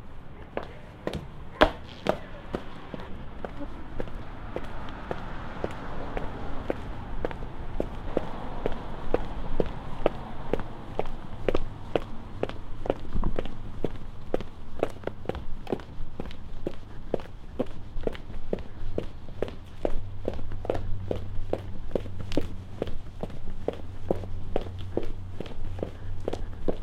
heels on city sidewalk
Sounds of a woman in heels walking down some marble steps then down the sidewalk at various speeds. This was recorded in the city of San Francisco on Chestnut street at 6pm.